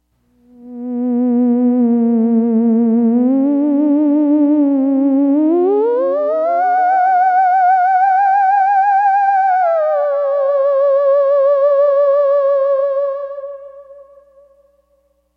scifi ether b
Mono. Wet. Same phrase as ether a, but recorded wet with slight delay and reverb.
ethereal-atmosphere-1, theremin, variation-2